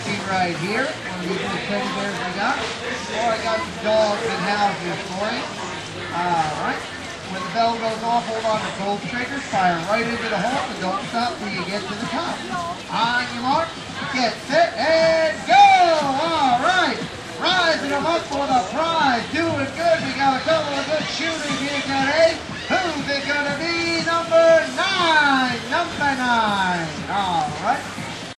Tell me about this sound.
wildwood mariners game
People playing games to win cheap prizes on the boardwalk in Wildwood, NJ recorded with DS-40 and edited in Wavosaur.
ambiance, boardwalk, field-recording, nj, wildwood